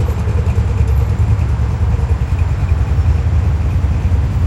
A loop made from traffic sounds recorded at the Broadway tunnel San Francisco ca.